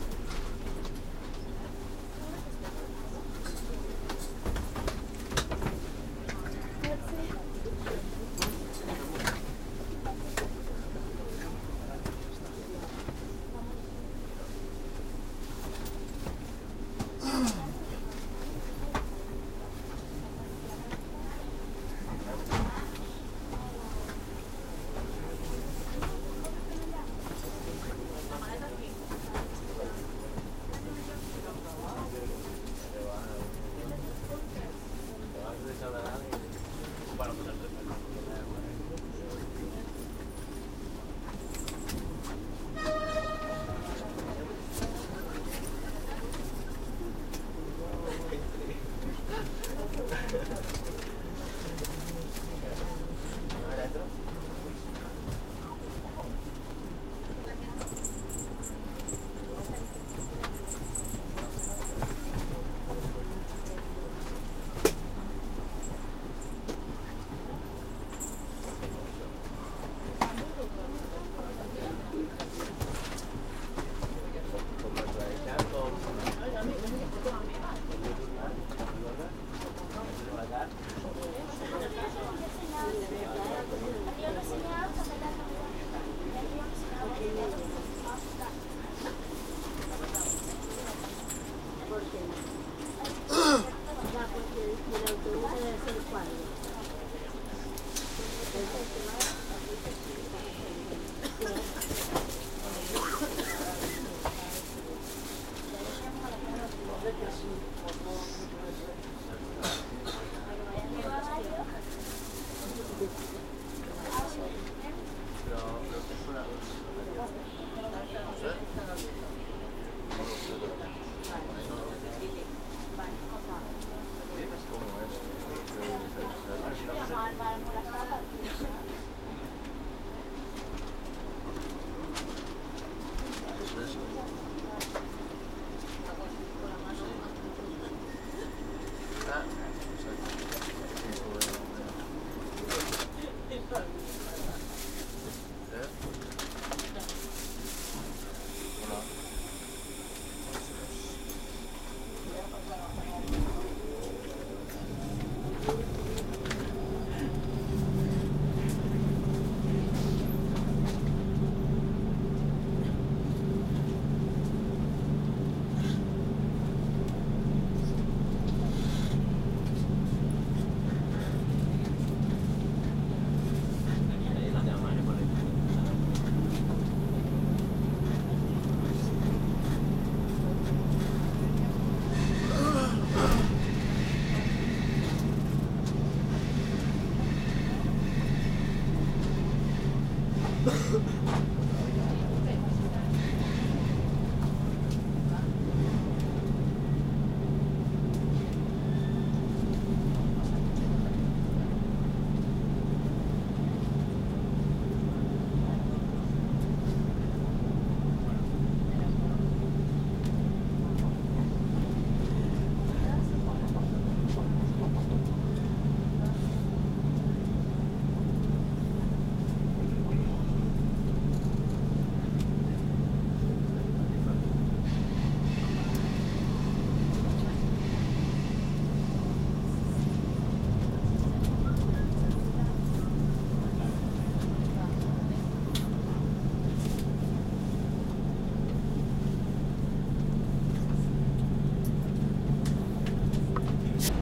On the way home, I made a few recordings. In this one you can hear normal train sounds: people getting on and off, talking to each other, putting their bags on the rails, shuffling in their seats etc. Mono.